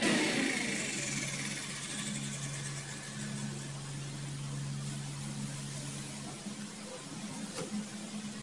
a different edit of the fan turning off